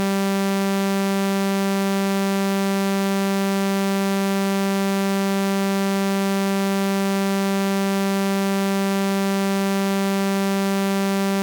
Doepfer A-110-1 VCO Saw - G3
Sample of the Doepfer A-110-1 sawtooth output.
Captured using a RME Babyface and Cubase.